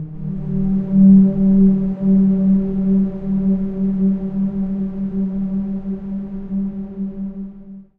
SteamPipe 5 SteamPad G#2
This sample is part of the "SteamPipe Multisample 5 SteamPad" sample
pack. It is a multisample to import into your favourite samples. A
beautiful ambient pad sound, suitable for ambient music. In the sample
pack there are 16 samples evenly spread across 5 octaves (C1 till C6).
The note in the sample name (C, E or G#) does indicate the pitch of the
sound. The sound was created with the SteamPipe V3 ensemble from the
user library of Reaktor. After that normalising and fades were applied within Cubase SX & Wavelab.
reaktor, pad, multisample, ambient